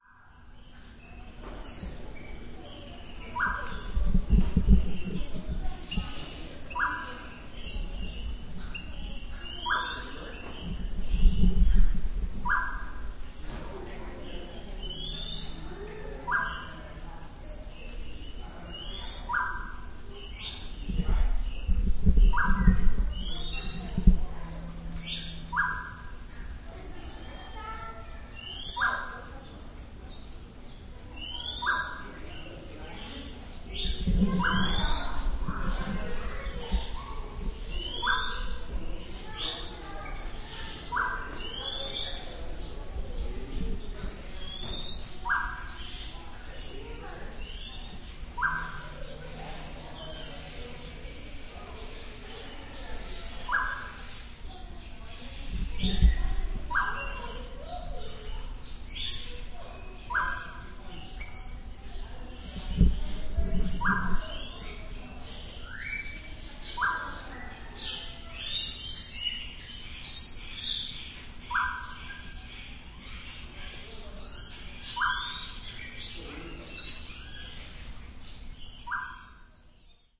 je aviary
Ambiance from the National Aviary in Pittsburgh.
birds, crowds